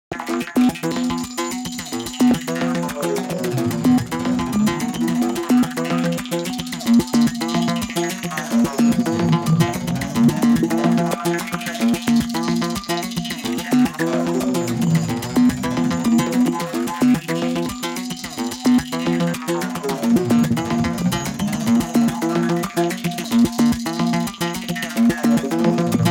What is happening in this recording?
bizzare psychedelic trip